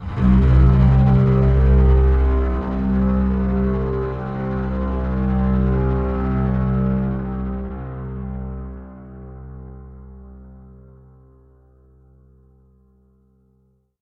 this is a sustained cello C note that i ran into a mic to a korg synth that went into a peavy km50 it has also been edited in logic to make it extra longer and stringier